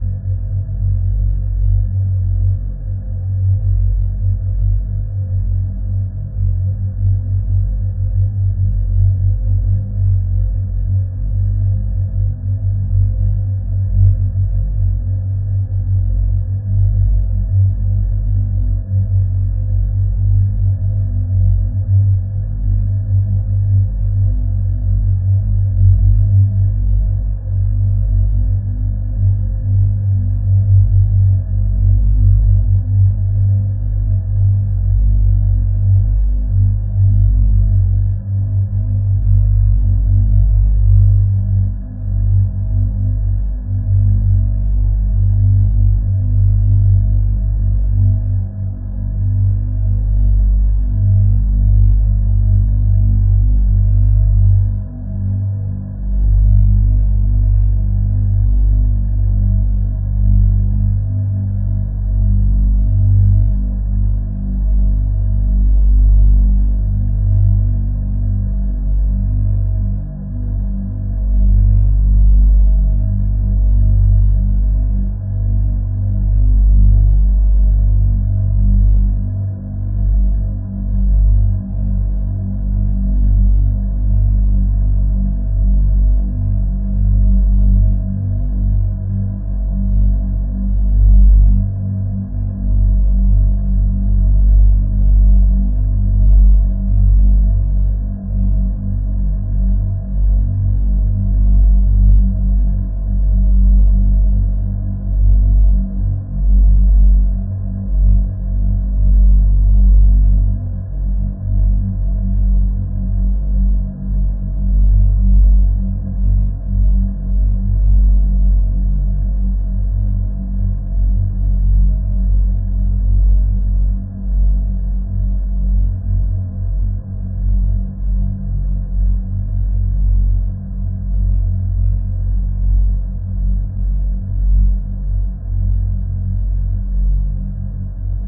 Spooky Ambiance #4
horror, eerie, ambiance, spoooky